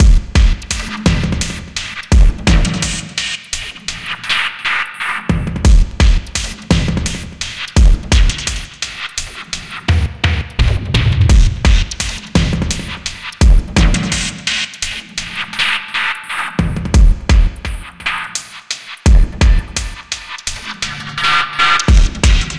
Glitch Drum loop 8d - 8 bars 85 bpm
Loop without tail so you can loop it and cut as much as you want.
beat, drum, drum-loop, drums, electronic, glitch, groovy, loop, percussion, percussion-loop, rhythm